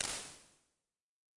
Artificial impulse responses created with Voxengo Impulse Modeler. Hat shaped building for singing and dancing in.
response, reverb, ir